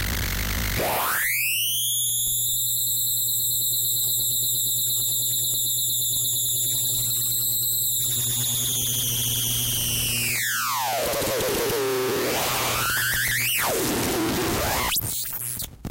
Squealing whistle noise from a circuit bent tape recorder.
Sweeps from a a low pitch to very high pitched.
bent, hum, circuitbending, electricity, power, glitch, sweep, highpitched, electronic, lofi, noise